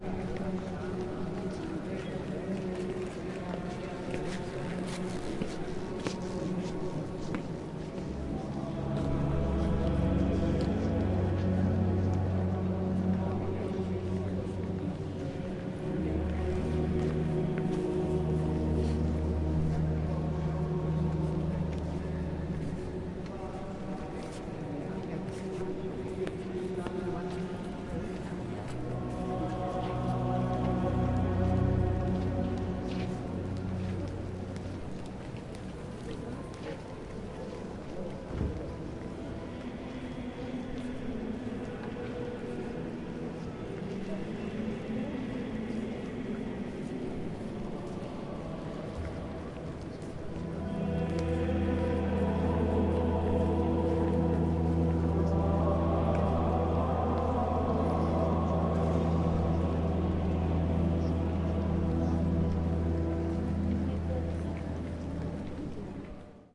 St Peter Basilica 02
Recording walla and people and reverb in St. Peter’s Basilica in Vatican City. About halfway between the altar and the main doors, pointed across the church (main doors on the left, altar on the right).
Recorded on 26 June 2011 with a Zoom H4. No processing.